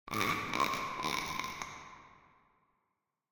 Clown Cackle
A creepy clown laugh inspired from Sweet Tooth from Twisted Metal. Source is my own voice. I tried to match this to the original inspiration with the cackle-like formants that Sweet Tooth's laugh has, which has been said to been from a sound library by a female sound designer working for SingleTrac.
laughter, cackle, evil, laugh